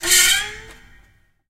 recordings of a grand piano, undergoing abuse with dry ice on the strings